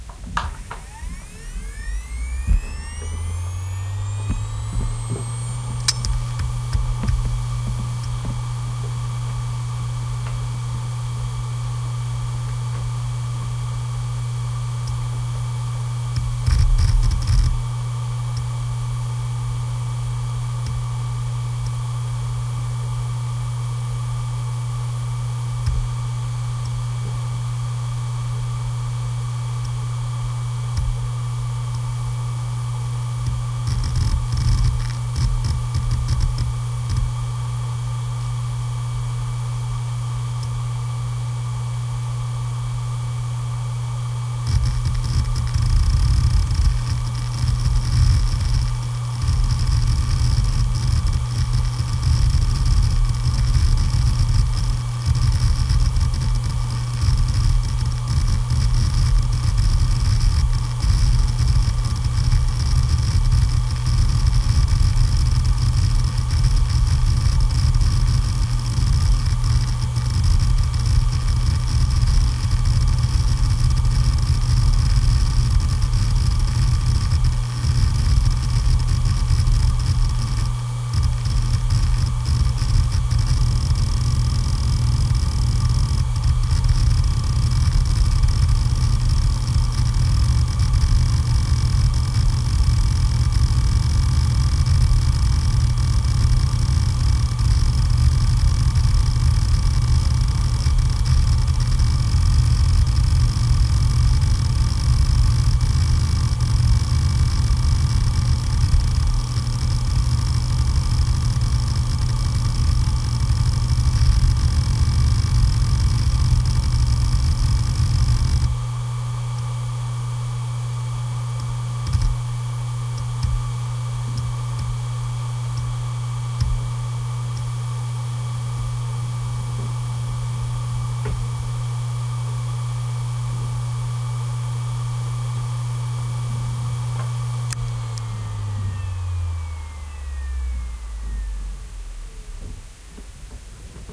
Hard disk drive Hitachi from 2005
Sounds of an old hard disk drive from 2005 (Hitachi). You hear starting of the spinning noise and the heads rumbling when data is read and written. I started a short defragmenting to make the disk noisy.
Recorded with a Roland R-05
computer, defragmenting, disk, drive, hard, hdd, machine, motor, noise, rattle